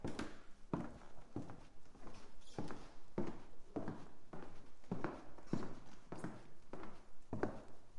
Steps on a wooden floor
Steps (heavy army boots) on a wooden floor.
Boots, wooden-floor, Steps, floor